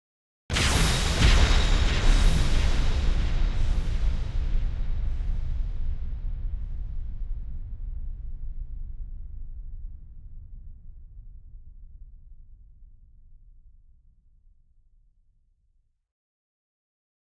double-explosion bright & dark
An bright explosion followed by a deep, reverberating impact.
Made using my other sounds:
additive, bang, echo, enormous, explode, explosion, foley, gigantic, hit, huge, impact, request, sfx, sound, unearthly